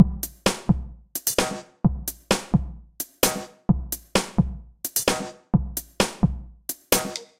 loop de bateria
bateria, de, loop